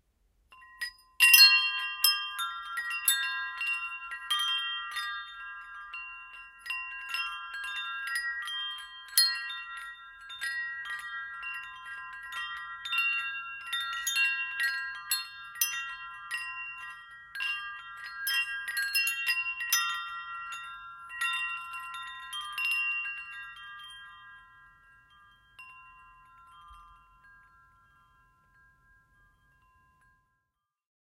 Wind chimes 2 (loud)
Wind chimes being obnoxiously loud. Could be used as a "the storm is coming" indication in the background sound setting of your choice. Recorded in isolation indoors as the wind would've killed the recording.
windchimes, chimes, wind, clank, metal, metallic, windchime, ting, background, chime, foley